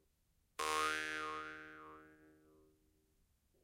Sounds captured during some interaction between me and a mouthharp I bought in Vietnam (Sapa). Marantz PMD670 with AT825. No processing done.